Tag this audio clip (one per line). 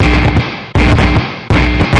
120bpm
buzz
ground
loop